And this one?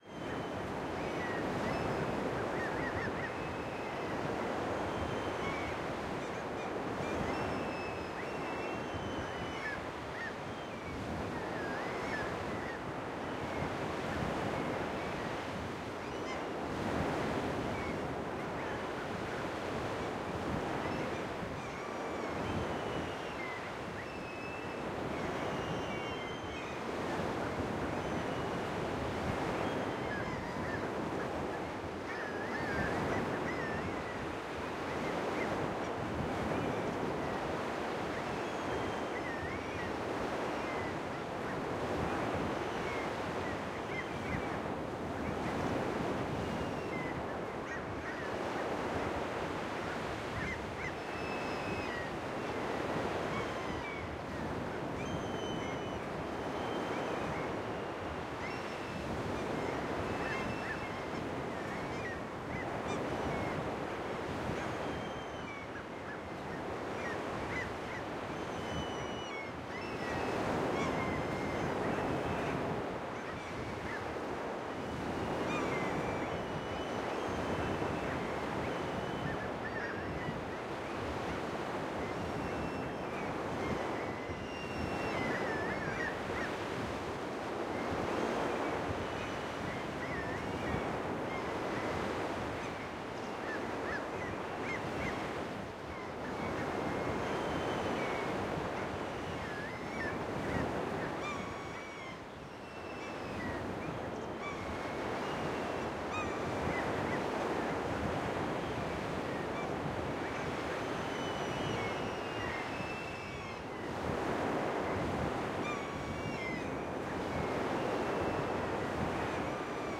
Atmosphere - Shore (Loop)
I´ve made this atmo with padshop pro. This is no field recording ;) If you wanna use it for your work just notice me in the credits.
Check out my other stuff, maybe you find something you like.
For individual sounddesign or foley for movies or games just hit me up.